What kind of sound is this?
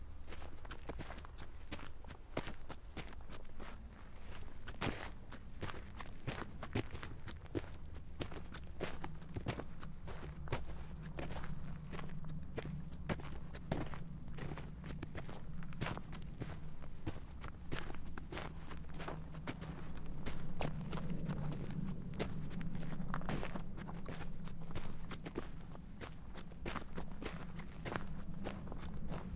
man walking on the street, there are no too many cars.
Is a country road and sometimes is possible hear some birds.
gravel, walking, dust, footsteps, man, walk, boots, street